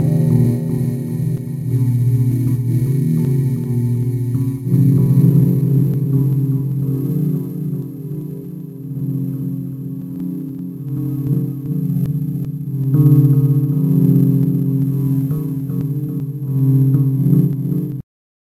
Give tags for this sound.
granular
harmony
ambient
glitch
rhodes
molecular
piano

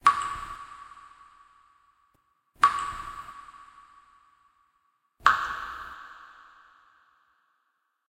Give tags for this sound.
blood
horror

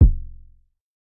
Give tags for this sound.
Sample
Drum
Analog
Kick
Jomox

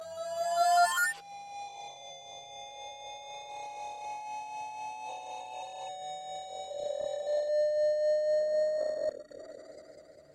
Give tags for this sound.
television
alien
warped
ambient
sci-fi
noise
weird
computer
electrical
ship
TV
space
electronic

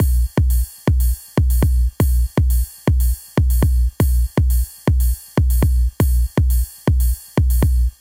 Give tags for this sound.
sincopa
claves
drum
reason

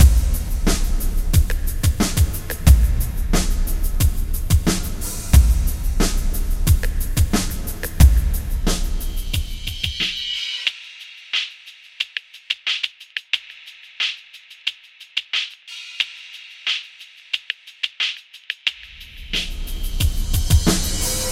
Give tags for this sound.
EQ
continuum4
hiss
drum
sequence
bpm
sparkle
bars
breakbeat
LFO
continuum-4
dance
90
tinny
break
breakdown
down
8

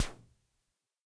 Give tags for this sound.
crash
drum
electro
harmonix

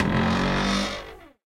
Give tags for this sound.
creaking
cupboard
door
horror

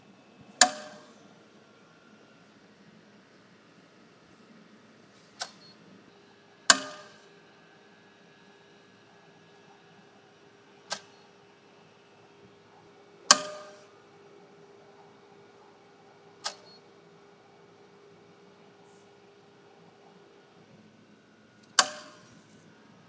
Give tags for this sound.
coin
machine
MTA
New
New-York
New-York-City
NYC
subway
ticket
underground
York

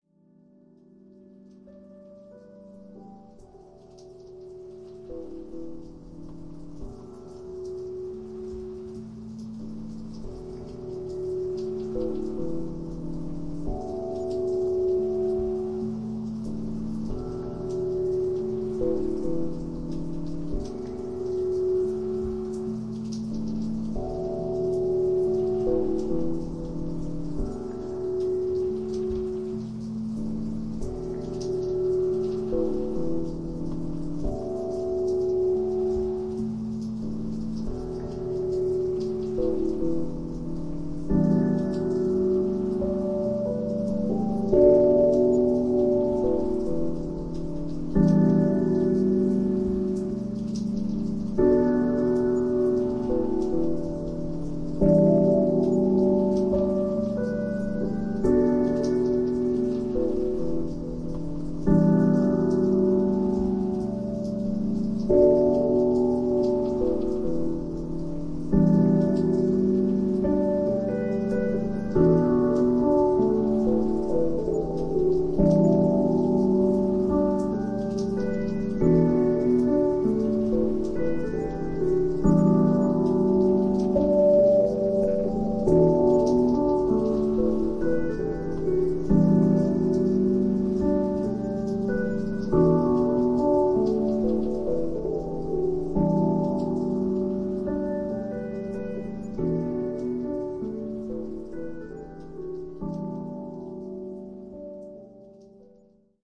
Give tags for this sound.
Sound-Design
Looping
Loop
Ambiance
Cinematic
Piano
atmosphere
Drums
Ambience
Ambient
commercial

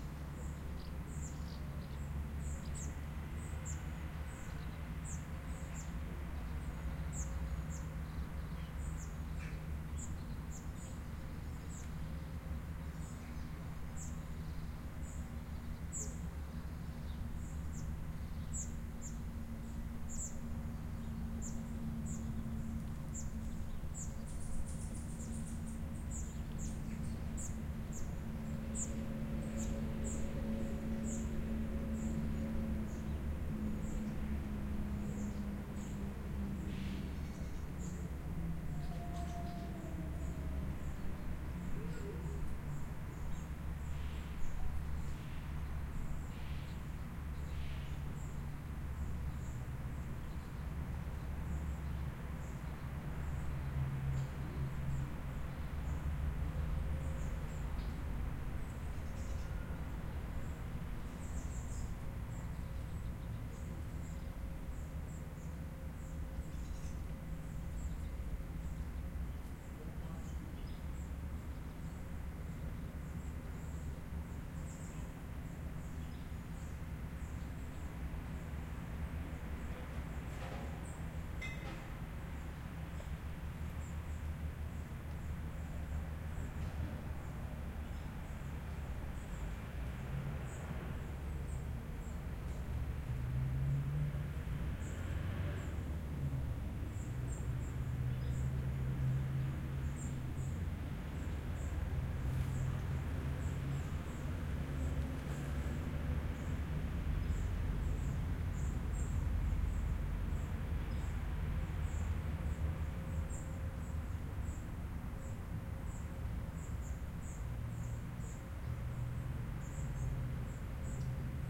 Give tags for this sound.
birds
Bus
City
Park
Public
traffic
Transport

